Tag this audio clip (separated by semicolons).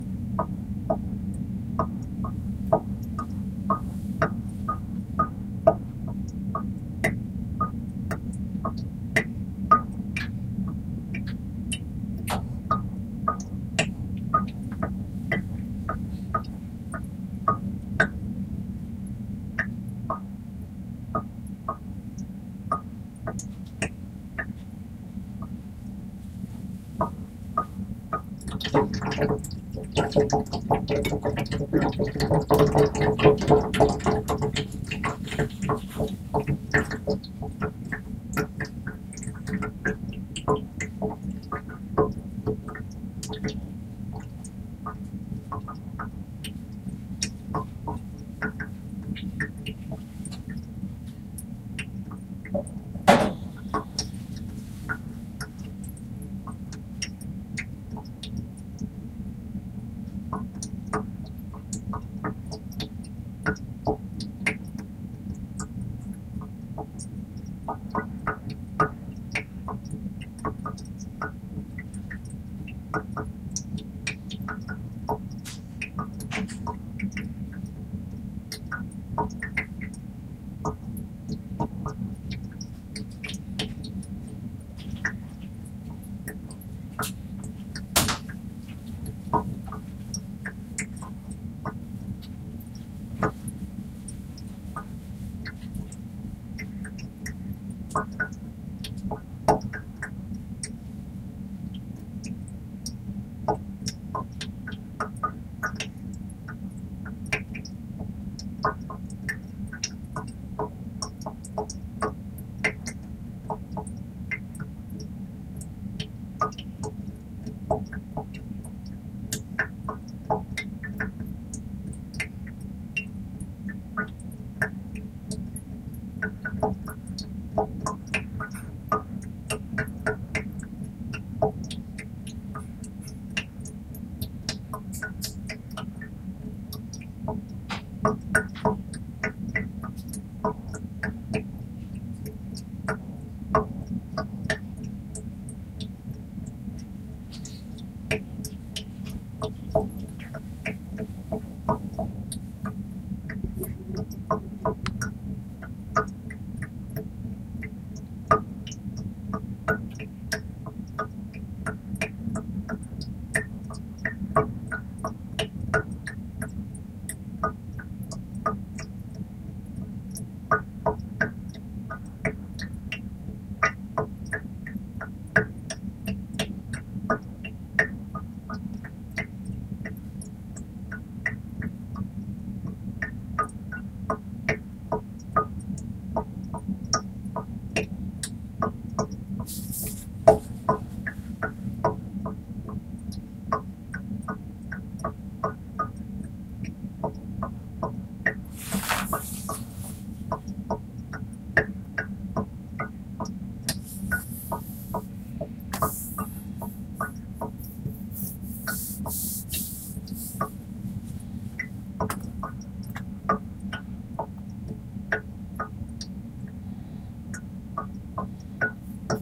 drain; dripping; faucet; running; sink; tap; water